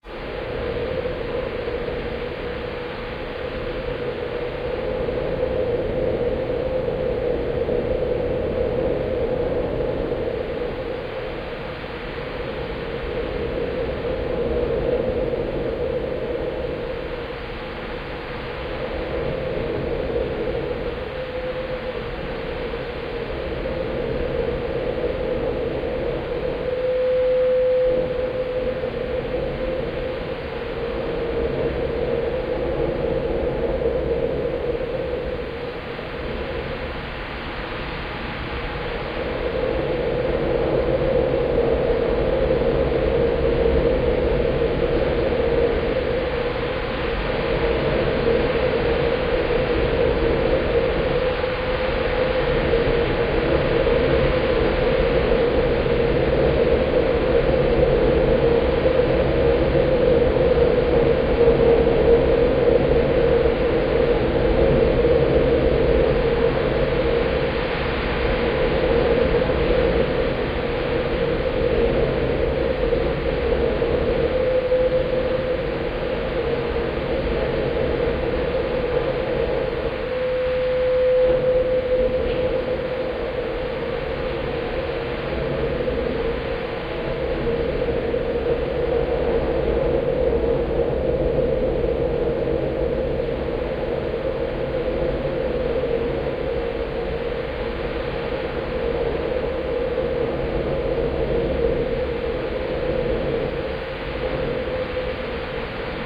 Drone loop made using sample from KiwiSDR